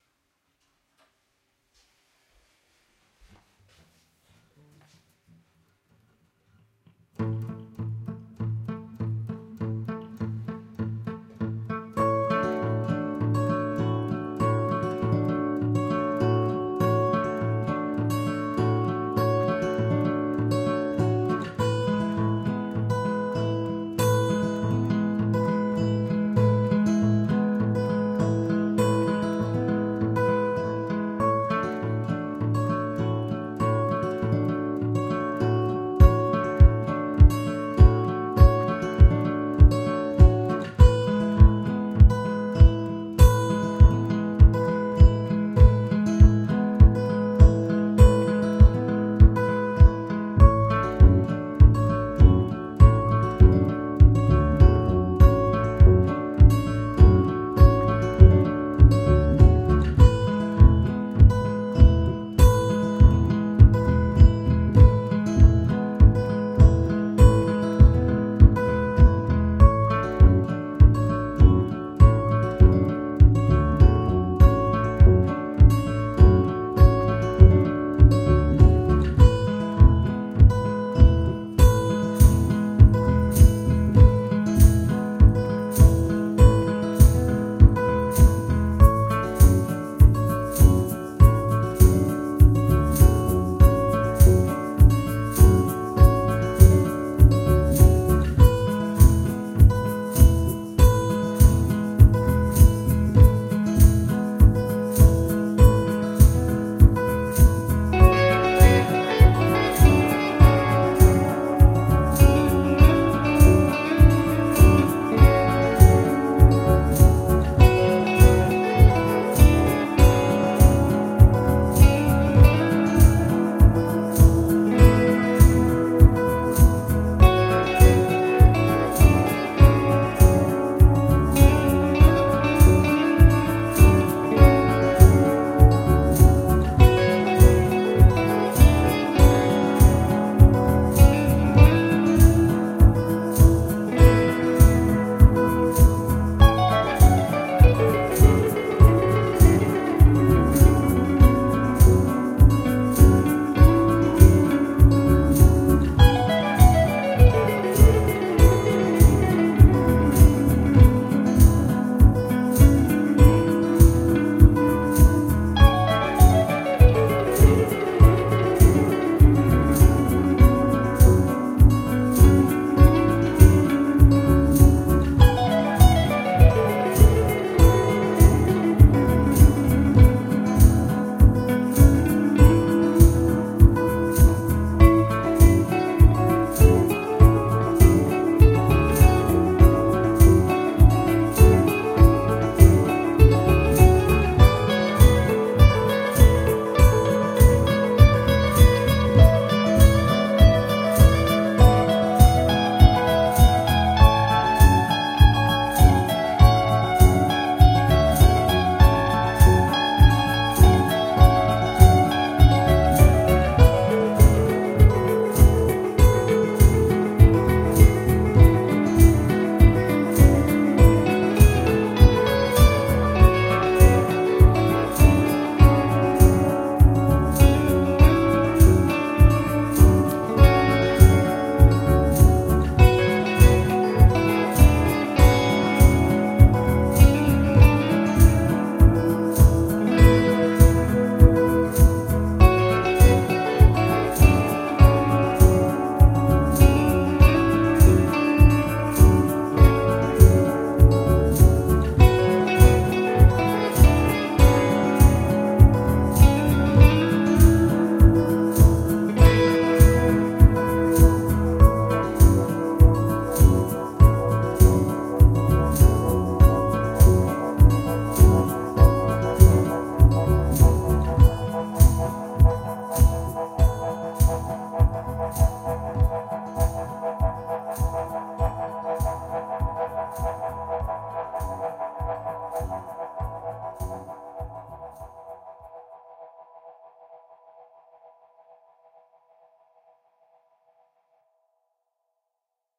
Guitar Jam-Loop "Hyperspace Leap"
This is experimental performace with Ableton live, where i using acoustic-guitar, Electric-guitar, Double bass, tmbourine and shaker like percussion, and Novation lanchKey mini and Akai Lpd 8 like midi-controllers. Melodic and sentimental music, using fingerpicking technique.
Tempo - 100 bpm.
live, electric-guitar, music, Abletonlive, oscillation, instrumetal, song, sound, double-bass, Shaker, sentimental, melodies, percussion, beautiful, Jam, experimental, improvisation, kick, echo, fingerpicking, performance, electronic, chords, loops, acoustic-guitar, tambourine, melodical, guitar, solo